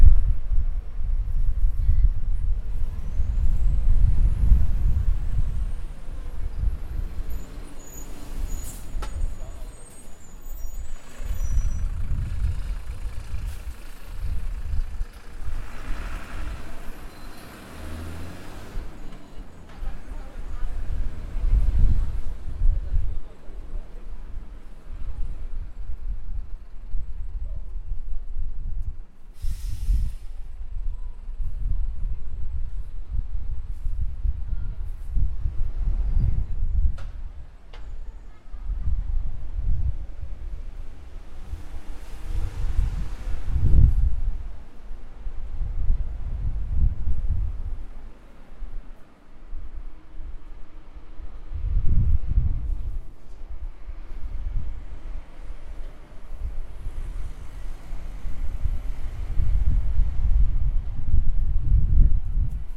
street sound
A stereo ambience recorded from the street.
ambience, field-recording, noise, stereo